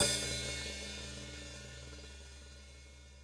open hat
hat, hihait, Open